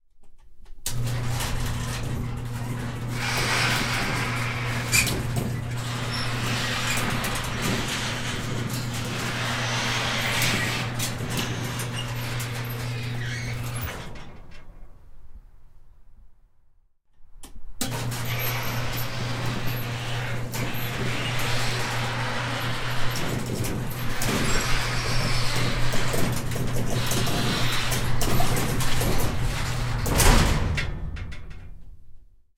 Garage Door Opening & Closing
Recorded with Zoom H6 inside a garage.
closed, door, garage, mechanical, metal, shut, sliding